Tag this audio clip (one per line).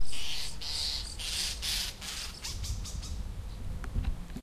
babies-of-birds
birds
birdsong
field-recording
naturaleza